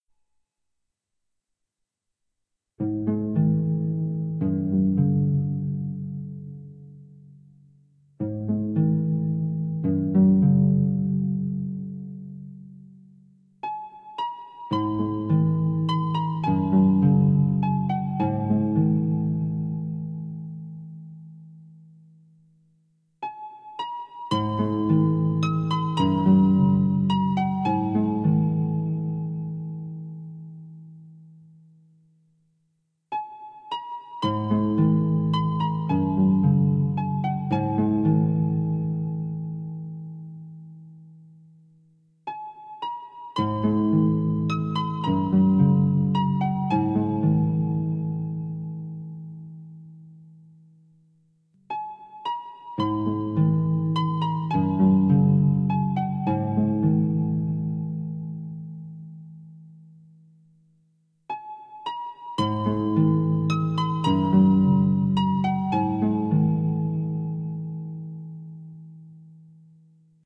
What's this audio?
relaxation music #21
Relaxation Music for multiple purposes created by using a synthesizer and recorded with Magix studio.
harp, meditation, relaxing